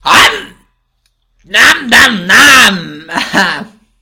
Mniam mniam hungry
A sound you make when you are hungry.
food, hungry, mniam